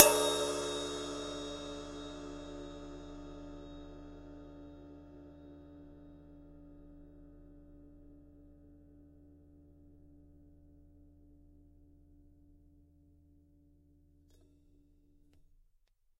This cymbal was recorded in an old session I found from my time at University. I believe the microphone was a AKG 414. Recorded in a studio environment.
ride, percussion